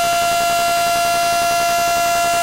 Feedback Interference
Feedback of my sound system with itself.